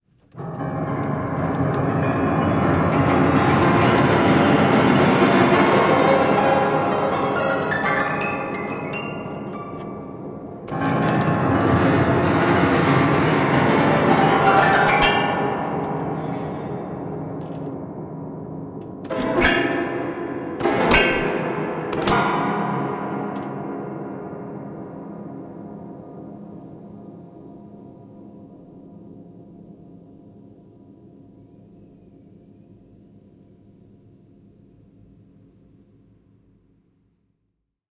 Detuned Piano Upwards 11 Twice Keysounds Stabs
A whole bunch of broken piano sounds recorded with Zoom H4n